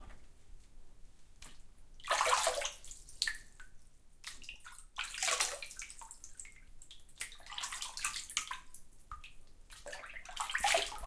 13FMokroluskyT padla
boat, ocean, sea, s